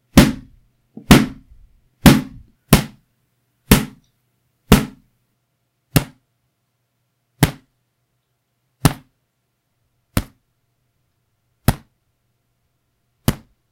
Boxing - Sounds of Block
Sound of block in boxing in several different dynamics.
Thanks a lot and have fun!
Block, Boxing, effect, fight, fighting, fist, fists, gloves, h1, man, mono, punch, SFX, sound, soundeffect, sport, woman, zoom